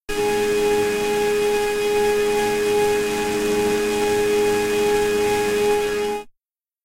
Drone Scream
digital, fx, harsh, sci-fi